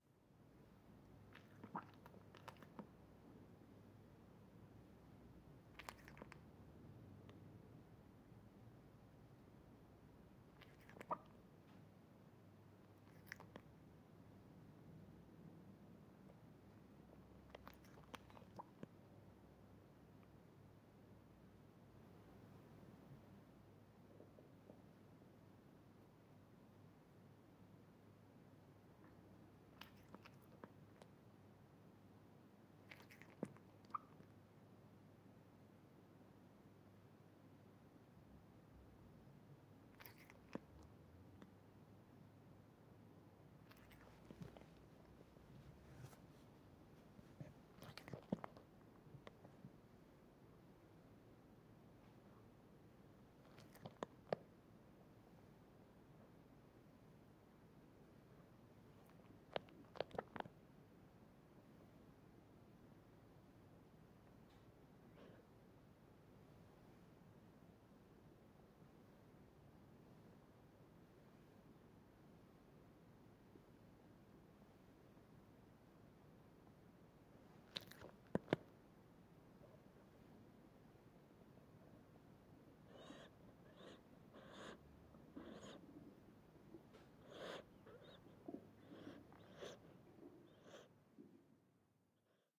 Cat swallowing after drinking water. Vienna.